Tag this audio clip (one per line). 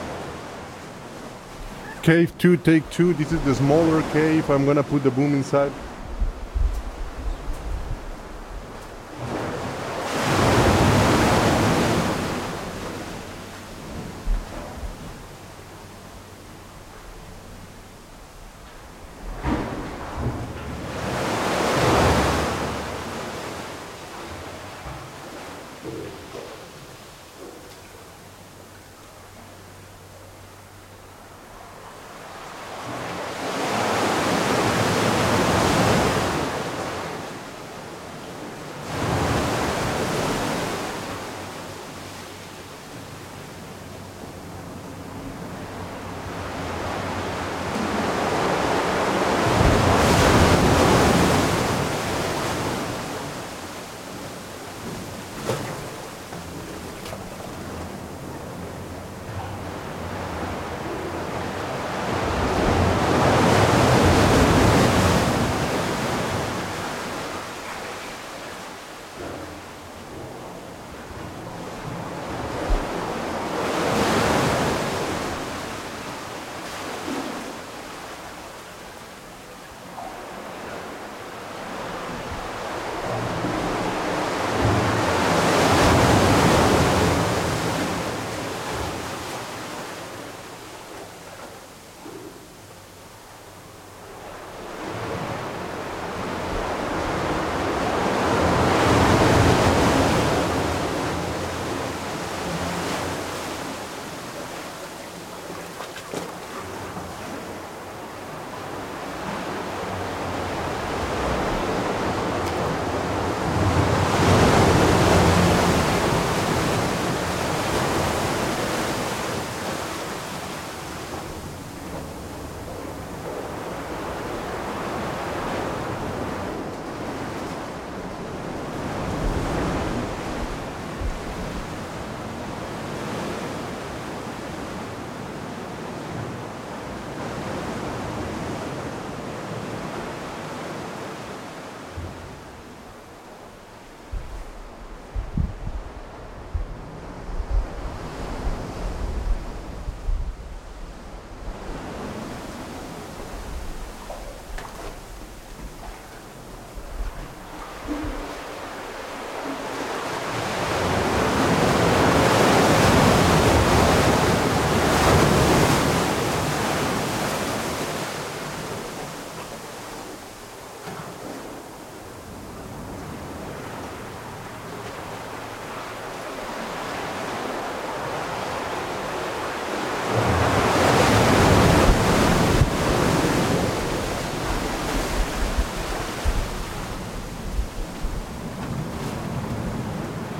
ocean
waves
cave
tide
caverna
sea
beach
playa
cueva
field-recording
olas